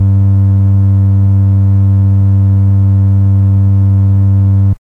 I recorded this Ace tone Organ Basspedal with a mono mic very close to the speaker in 16bit